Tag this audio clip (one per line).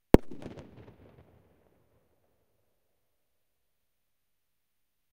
Fireworks
Bang
Boom
Loud
Firecrackers
Explosion